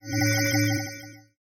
Sound effect for sci-fi browser game